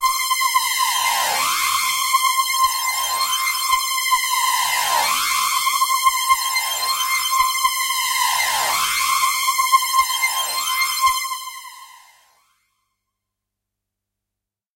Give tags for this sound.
waldorf synth electronic hard phaser multi-sample lead

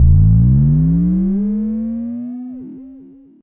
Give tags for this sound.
1 Analog DRM Drum Sample Synth Vermona

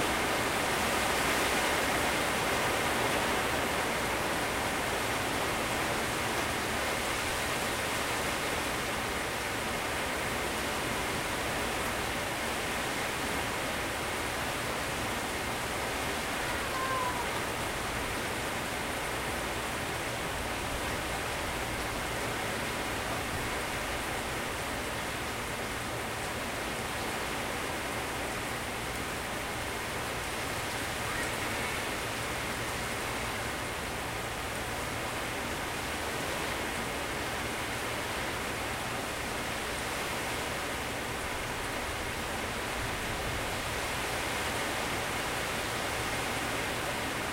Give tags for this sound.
field-recording thunder storm

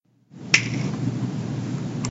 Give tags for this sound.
noisy this t

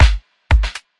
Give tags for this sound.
percs loop rhythm 120bpm drums percussion groovy quantized beat hat drum percussion-loop kick odd timing drum-loop

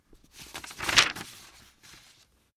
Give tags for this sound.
page hollywood Turn-over-a-book-page magazin mood horror read author office desk suspense background film movie cinematic ambience library newspaper book dramatic paper background-sound

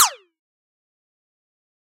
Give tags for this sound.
shoot sci-fi lazer

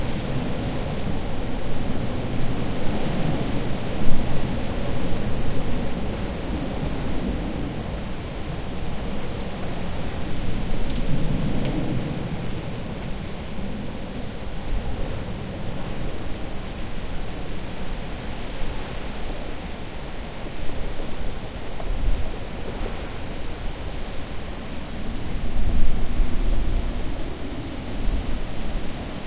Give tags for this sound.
rain storm heavy weather field-recording wind

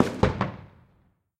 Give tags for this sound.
blast firework explode bang shot boom sharp cracker

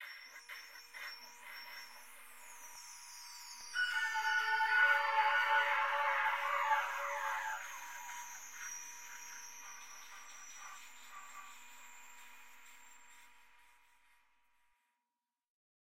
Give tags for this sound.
shrill convolution siren squeal ringing

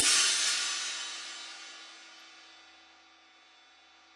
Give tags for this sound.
1-shot cymbal hi-hat multisample velocity

